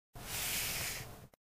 fh Paper Swipe Surface2 Long 01
swiping paper over table